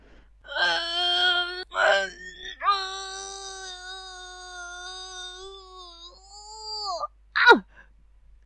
I was making a short film and needed a specific sound effect, so I recorded myself trying to push something that's too heavy. It also can be used for someone who's trying to open something shut tight. I also added a scream because the character on my short fell after trying to pull, so you can use that too if you want :)
force, grunt, grunts, pull, pulled, pulling, push, pushing, struggle, struggling